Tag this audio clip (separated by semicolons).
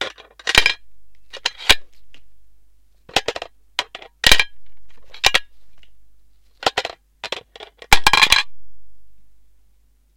coin; metal; metalic; slot; tincan